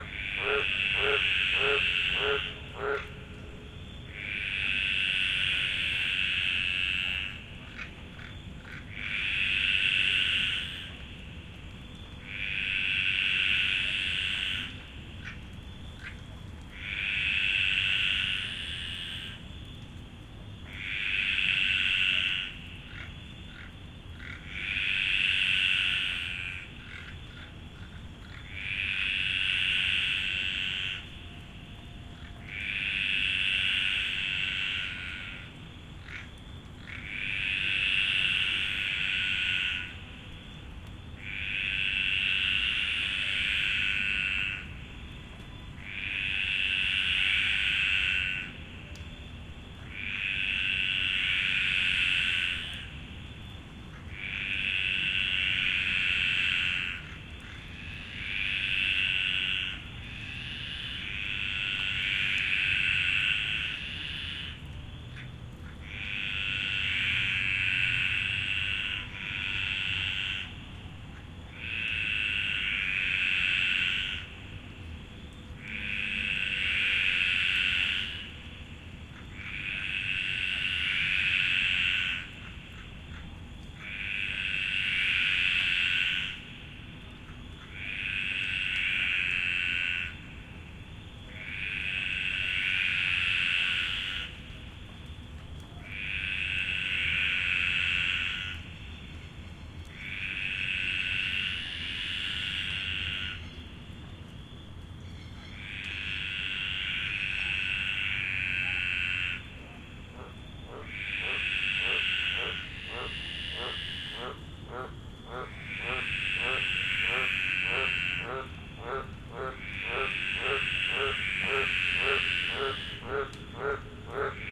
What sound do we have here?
Frogs Nettle Sample
field-recording, nature, wet